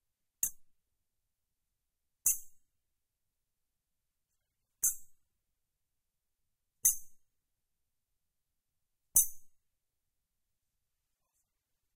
Metal,Grate,Floor,Hit,Pickaxe,Hammer,Thingy,Hard,Great,Hall
Part of a series of various sounds recorded in a college building for a school project. Recorded with a Shure VP88 stereo mic into a Sony PCM-m10 field recorder unit.
field-recording, school, sfx